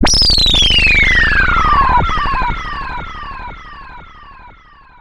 semiq fx 21
effect, sound-design, future, sfx, abstract, fx, soundesign